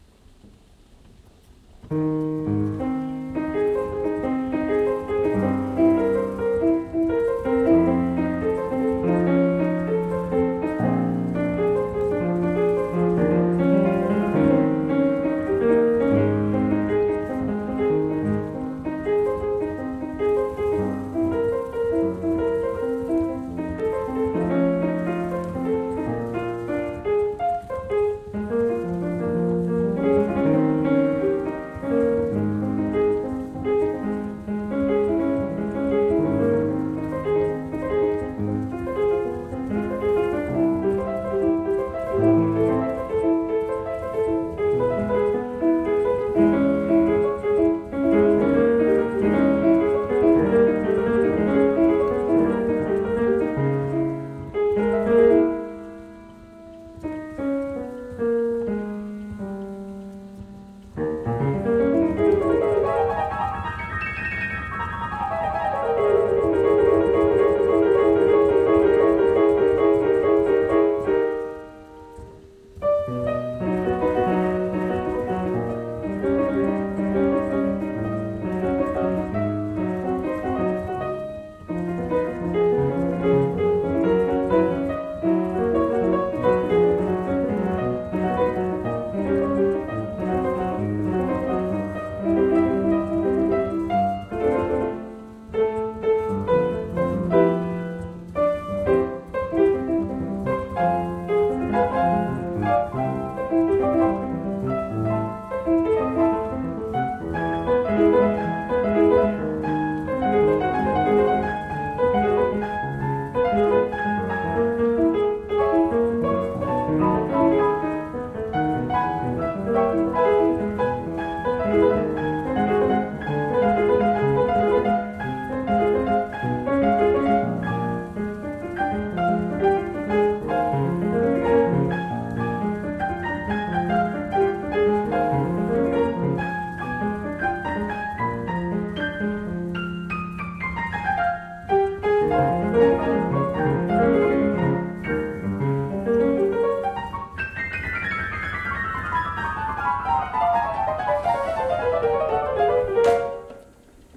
Welte Mignon Piano
During the second half of the nineteenth century, the firm of Michael Welte und Söhne in Freiburg-im-Breisgau, southern Germany, achieved world renown as a manufacturer of orchestrions. Over several decades it built up a skilled workforce, both for the construction of the instruments and for the arrangement of the music rolls which caused them to play. So in 1904, it was ideally placed to develop an experimental piano playing device, with the aim of reproducing the recorded performances of the finest pianists of the day. What we now know as the Welte-Mignon was originally called, quite simply, the Mignon, an essentially French word meaning both small and pleasing, to distinguish it from the firm's other instruments, which were all considerably larger.
Pianist: Eugen D'Albert (1864 - 1932)
Instrument: Deutsches Musikautomaten Museum Bruchsal (Germany)
Recording: Tascam HD-P2 and BEYERDYNAMIC MCE82